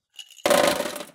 Ice cubes being dropped from a glass into a kitchen sink